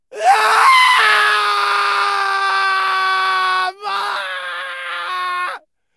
Sad cry 3
Just so sad about something.
Recorded with Zoom H4n
acting, agony, anguish, clamor, cries, cry, distress, emotional, grief, heartache, heartbreak, howling, human, loud, male, pain, sadness, scream, screech, shout, sorrow, squall, squawk, ululate, vocal, voice, wailing, weep, yell